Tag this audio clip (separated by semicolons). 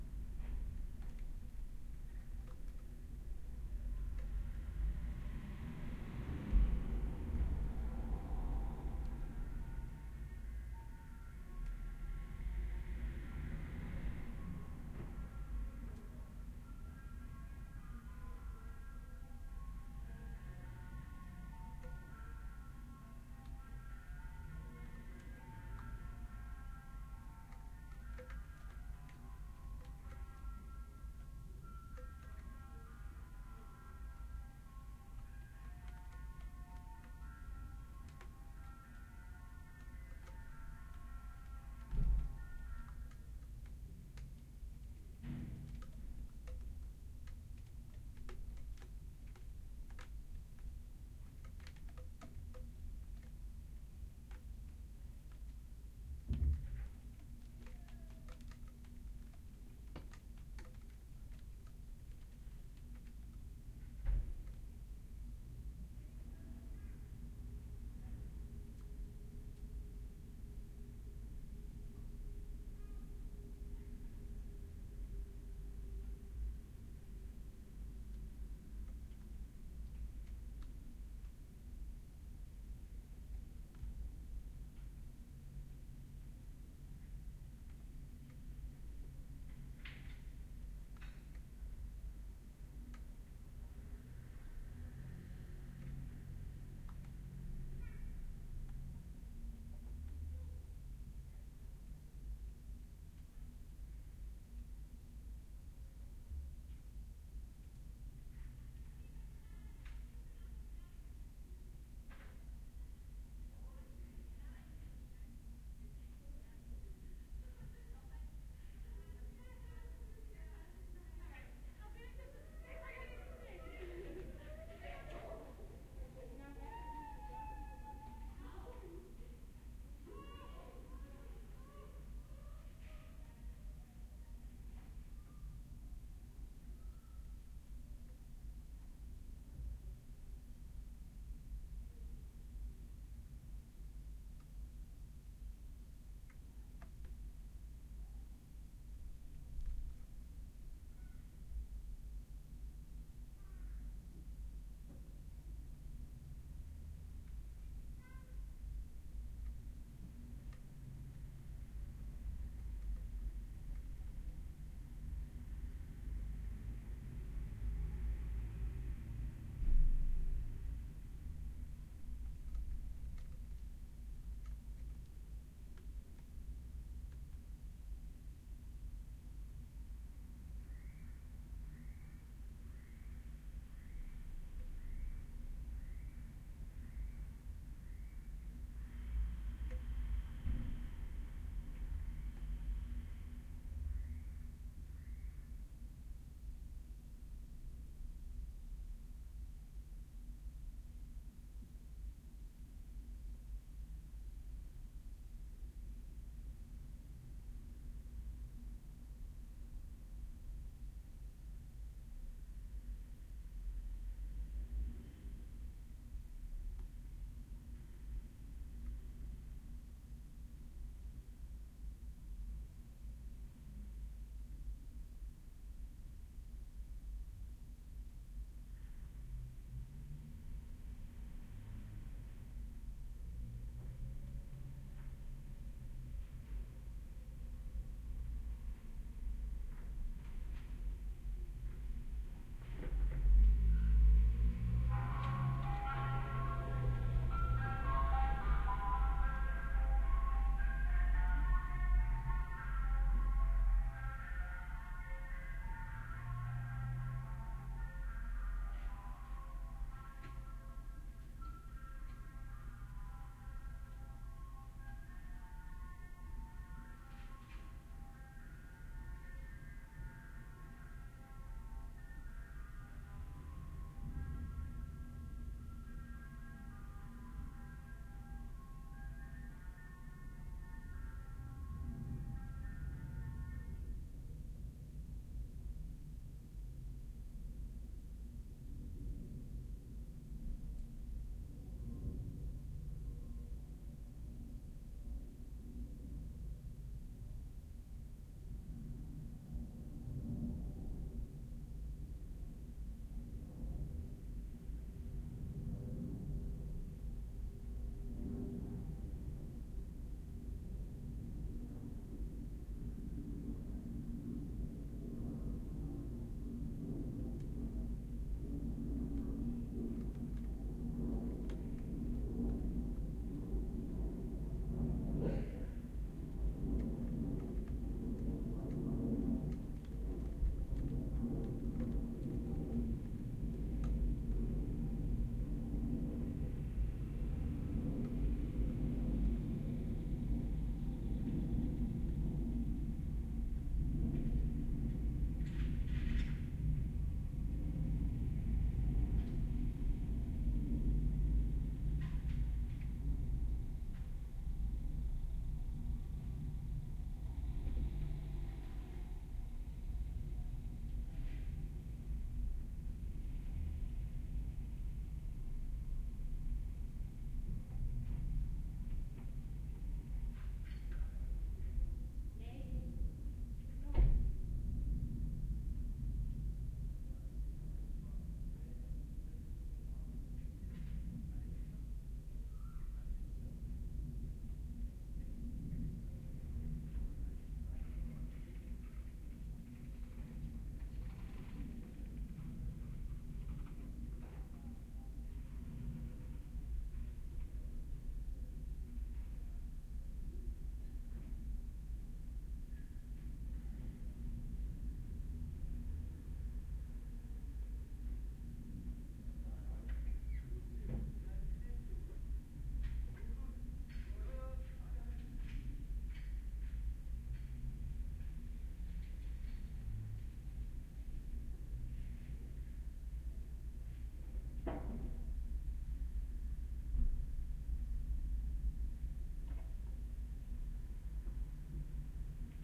rain; the-Netherlands; ijscoman; roomtone; background; Amsterdam; inside; small